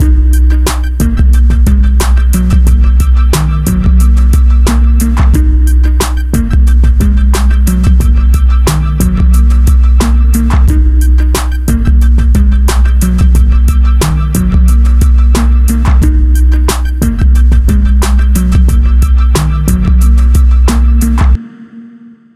Hip hop beats techno
experimental hip hop beat wih a techno vibe